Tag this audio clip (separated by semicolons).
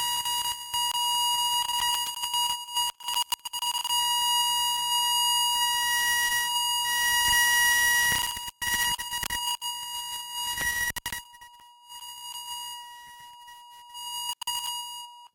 bending; circuit; electric